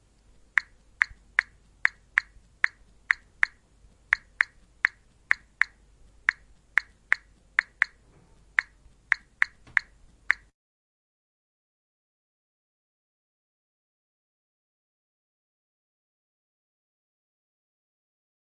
typing phone 02
This is the sound when you type the keyboard on your smartphone when you want to send a message.
tone, typing, smartphone